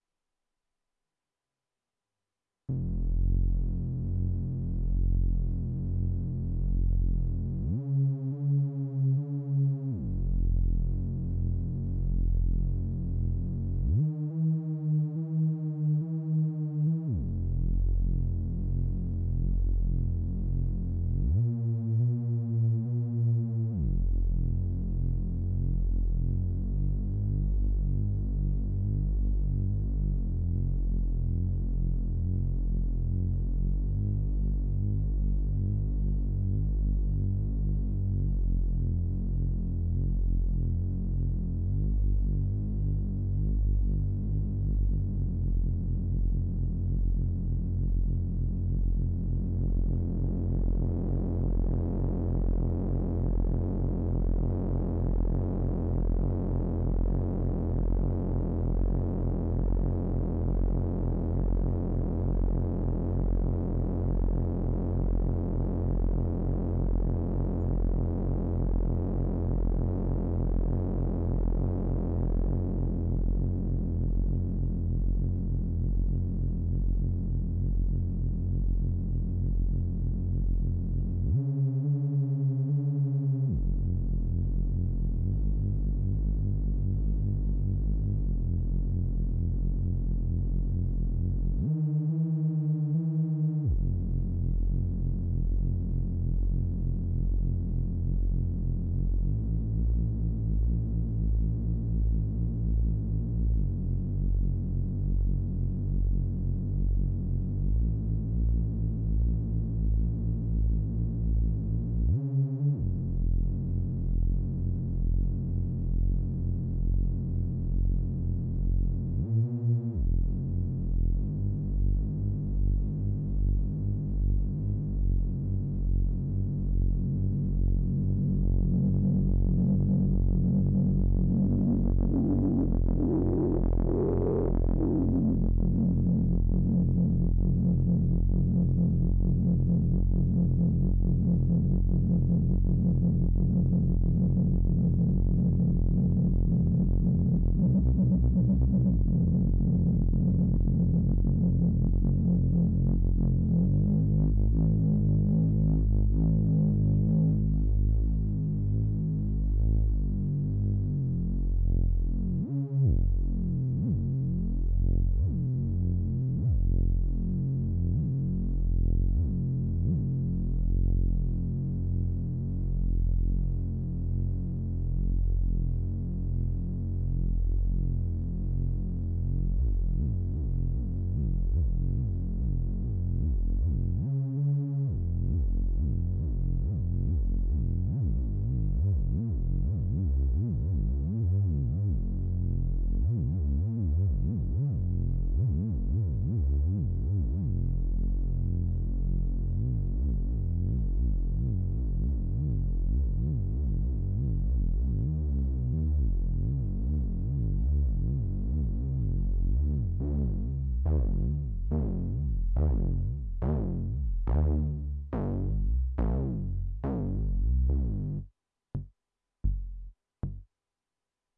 Bad on Maths, Good on Meth
oscillations of a disturbed mind
ambience, atmospheric, background, background-sound, drugs, general-noise, keys, korg, soundscape, soundtrack, synth, volca